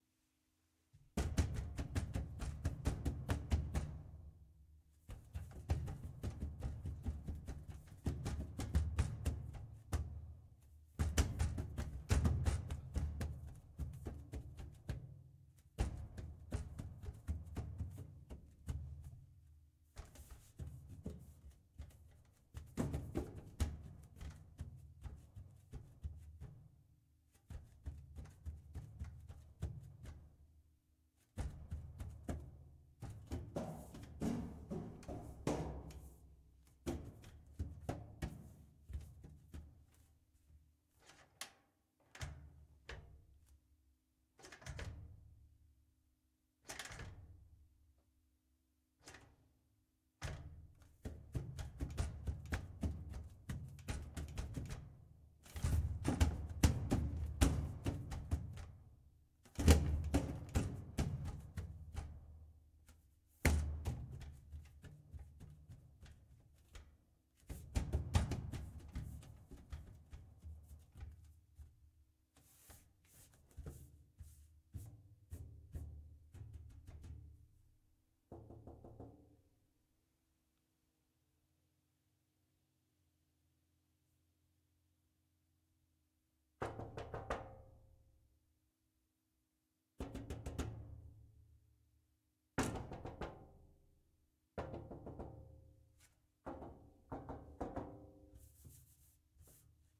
Banging and scuffling on the top of my washing machine; used for an effect where a team of people were crawling through and working in an air vent.